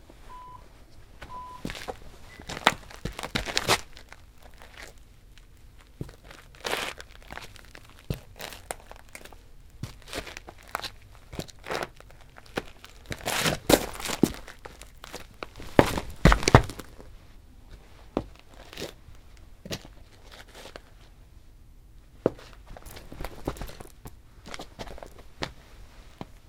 Recorded on the Foley stage at the Chapman University film school for my Audio Techniques class. I am doing a Foley walk on tile covered with gritty dirt, as well as a small amount of clothing rustle. I then take a few steps on clean tile.
This syncs with the scene in the beginning of Indiana Jones (Raiders of The Lost Ark) where Indy is walking towards the golden sculpture, across dirty tile and then up steps and onto what we assumed to be a cleaner surface.
This is the second take.
human, dirty, tile, grit, footstep, dirt, crunchy, footsteps, foley, walk, rustle